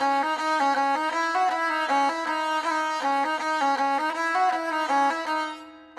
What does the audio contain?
Sarangi is a traditional instrument held upright and bowed across. Ambient/Sympathetic Stings to give a natural reverb.
From the Dhol Foundation Archive - Enjoy
Bow, Bowed, Indian, Sarangi, Skin, String, Tuned, Violin